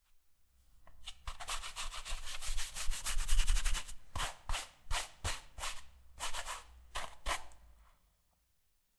brushing boots
house, cleaning, housework